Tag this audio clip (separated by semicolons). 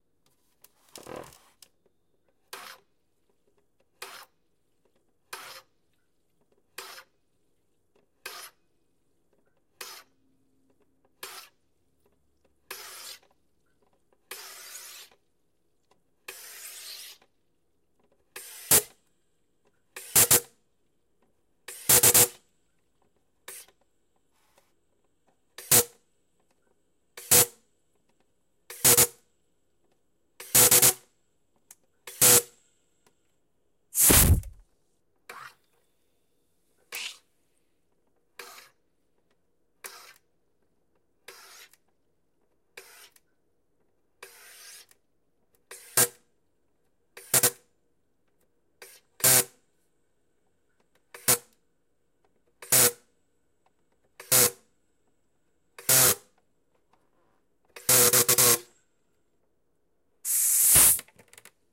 soda-stream; whoosh; buzzing